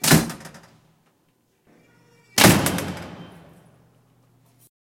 door close JM
door closing, two versions